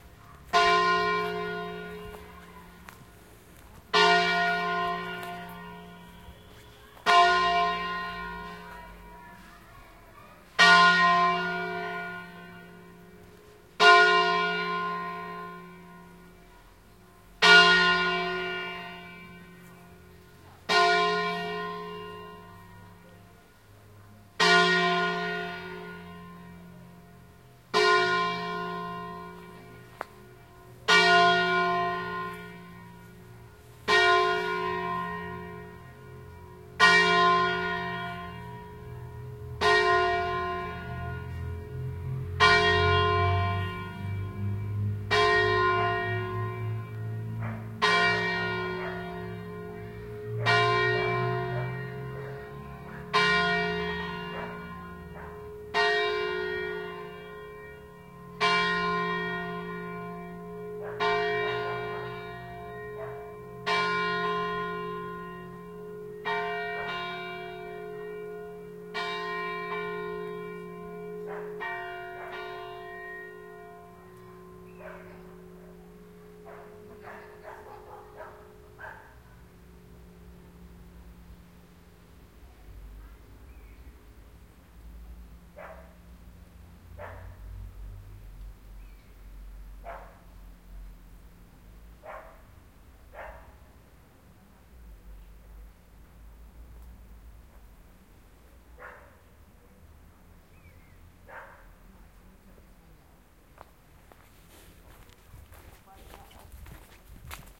2015 Vanzone ChurchBells 2 and short garden ambience dogs barking
in the italian mountains
bells, church, field-recording, italy